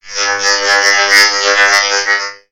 A robot. I think this was a random sound that was over-processed with echo. Recorded with a CA desktop microphone.
computer, nonsense